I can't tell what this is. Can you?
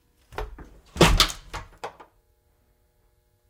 Wooden Door Closing Slamming